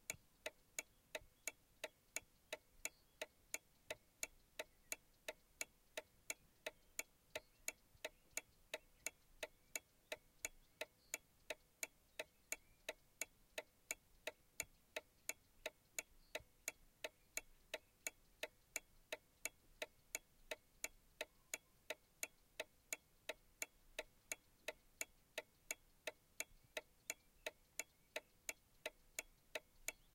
Turn Signals (Interior - Birds Outside the Car)
birds, car, interior, singals, turn